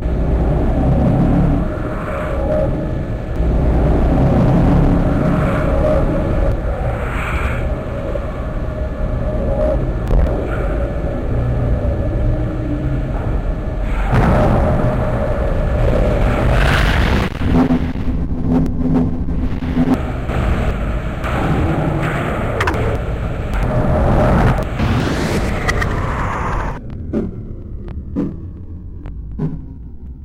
Composition made using SoundEdit 16 on Mac. This is is a field
recording of the ambient noise in an office slowed down and filtered
multiple times.